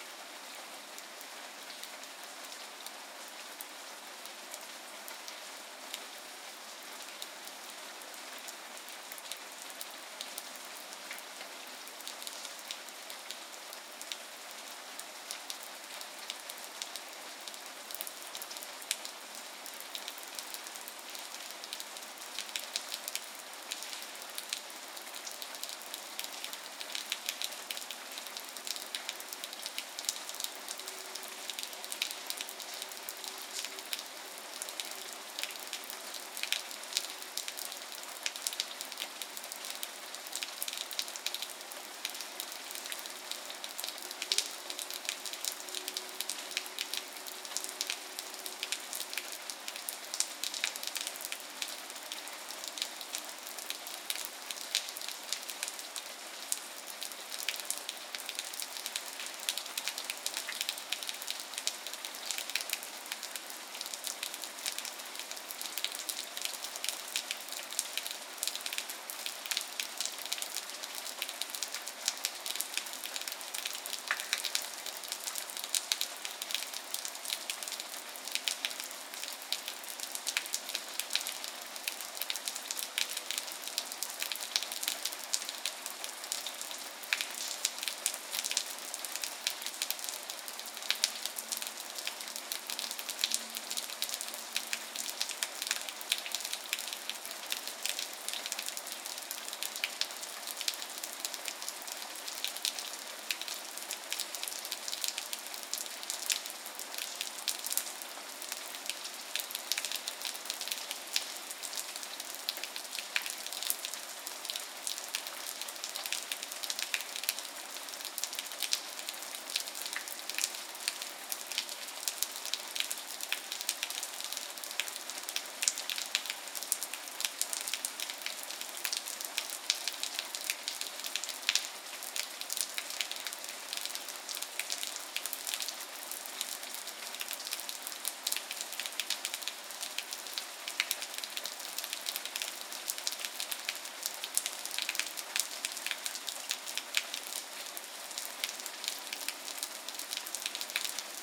AMB M City Rain Courtyard
This is rain falling in the courtyard of my apartment building.
Recorded with: AT 4073a, Sound Devices 702t
city, splash, splatter, water